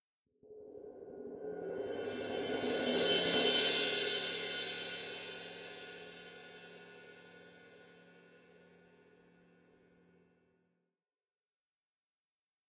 cymbal roll quiet 2
soft, cymbal, medium